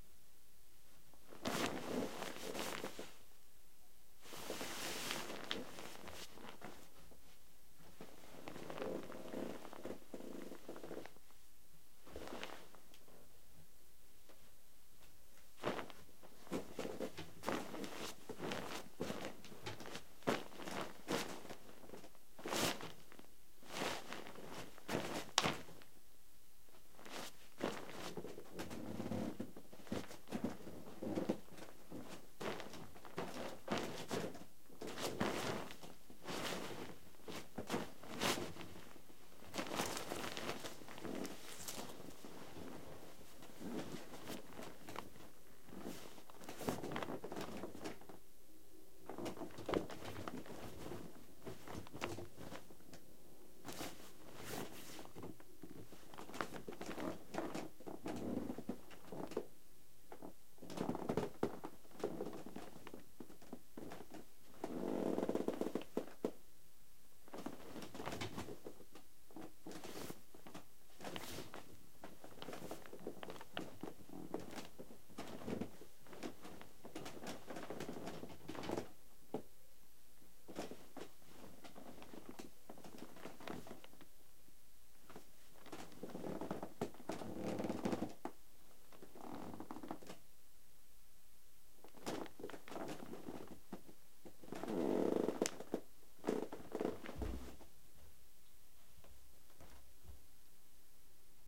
walk on bed
Walking on a bed.
bed, footsteps, walking